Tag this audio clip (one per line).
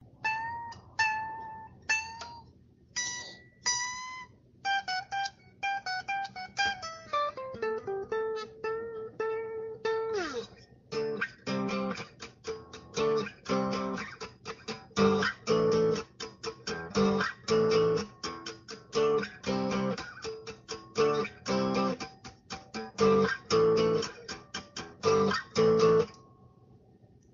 acoustic-guitar
guitar-solo
gibson